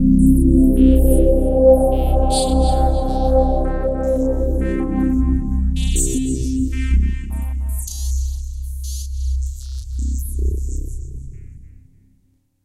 A filter sweep done with the Kawai K5000r.